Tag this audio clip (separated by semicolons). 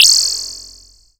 anime; armor; pick; pickup; protection; shield; survival; up